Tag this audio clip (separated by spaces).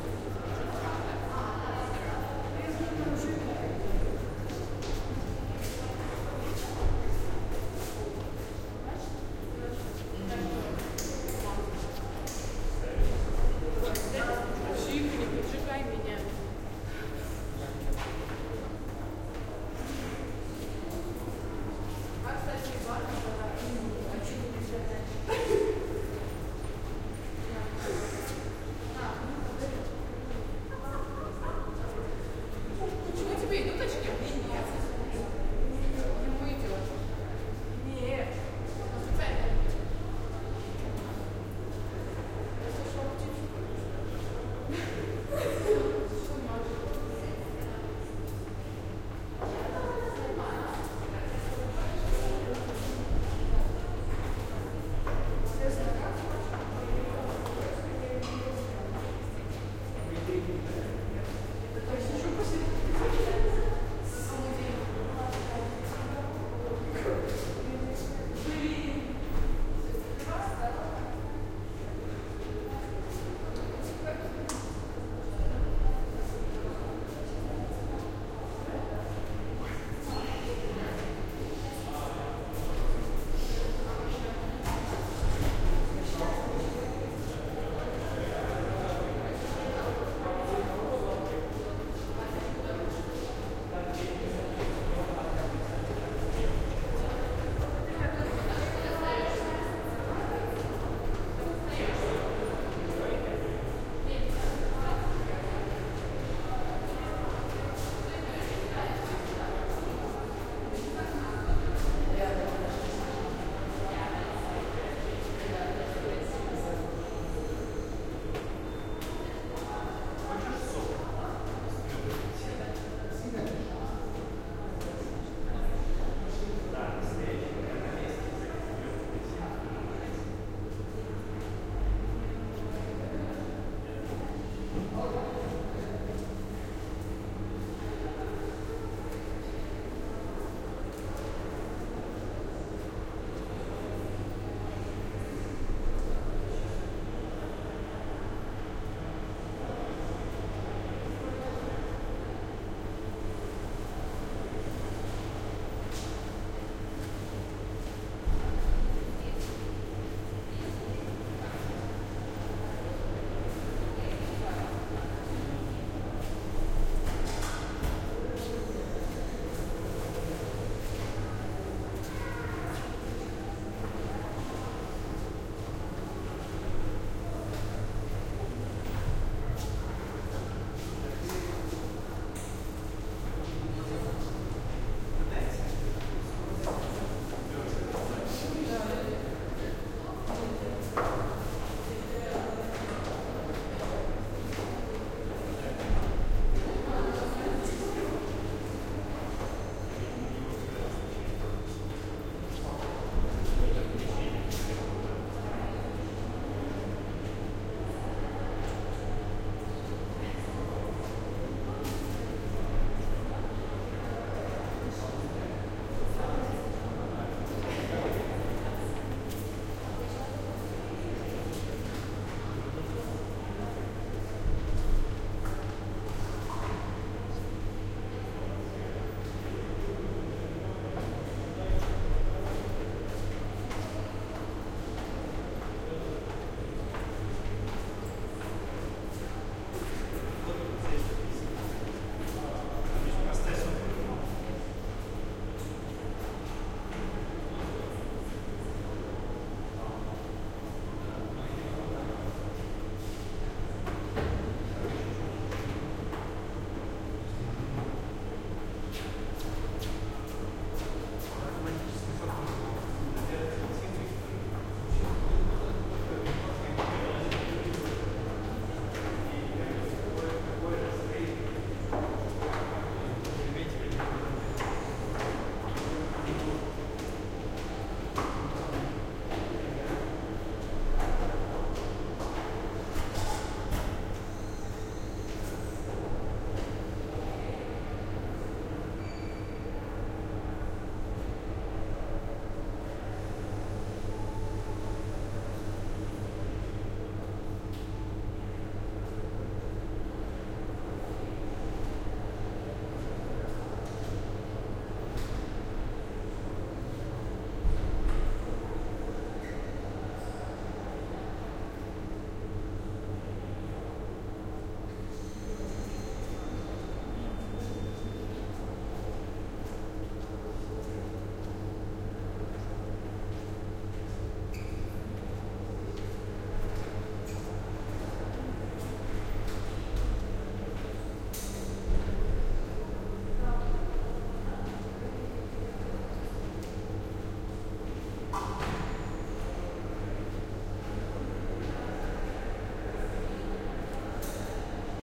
ambience atmosphere background buzz crowd field-recording hallway Moscow people Russia Russian stairs talking theatre ventilation voices